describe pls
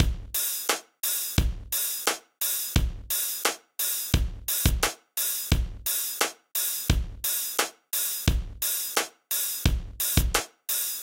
dirt drums 87bpm
a dirty drum loop made in 87bpm.
87bpm, drumloop, dirt, noise, mojomills, 87-bpm, beat, loop, beats, electronic, mojo, drums